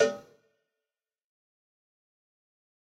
Dirty Tony Cowbell Mx 006
This is Tony's nasty cheap cowbell. The pack is conceived to be used with fruity's FPC, or any other drum machine or just in a electronic drumkit. ENJOY
cowbell, dirty, drum, drumkit, pack, realistic, tonys